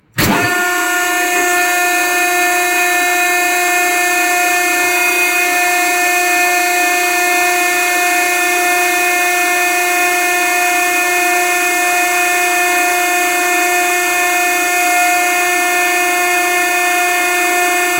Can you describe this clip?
(CAUTION: Adjust volume before playing this sound!)
A separate sound clip from "Dumpster_Press_2" mostly rendered as the end when the machine is starting up and begins to crush the garbage.